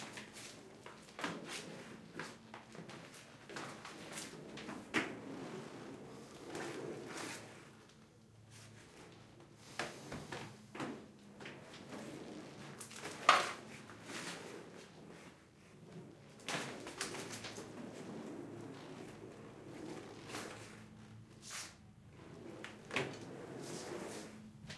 Rollerskating Indoors
One pair of rollerskates, indoors on a hard bumpy surface.
indoor, rollerskates, skates